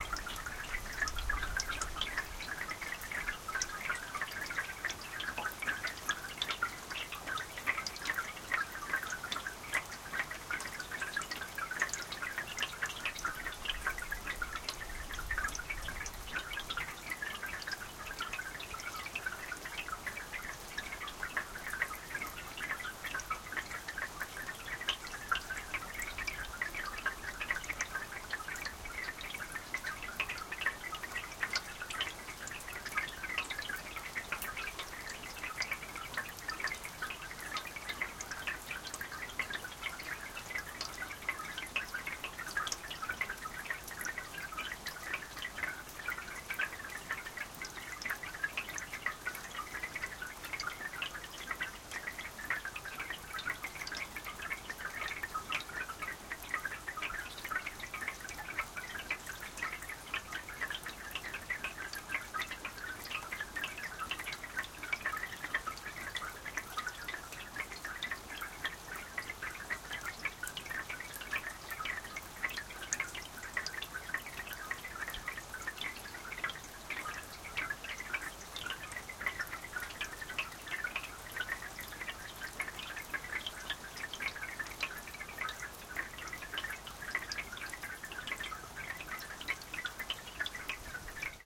Summer rain recorded in July, Norway. Tascam DR-100.